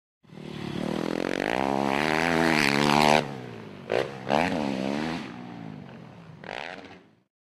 YZ450F-Jumping
yz450 jumping on mx track